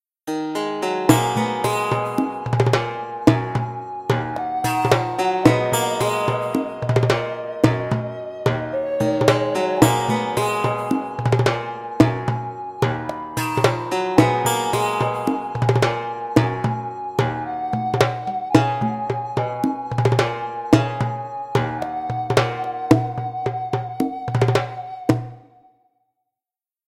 Eastern vibe instrumental loop that I worked on a few years back. Could be cool remixed and chopped up etc... or as is... Do whatever you like with iiiiit! Peace and biscuits
chilled, djembe, eastern, indian, sitar, slow